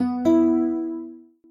Confirm - HarpEPianoEdit
made with mda Piano & VS Etherealwinds Harp VSTs in Cubase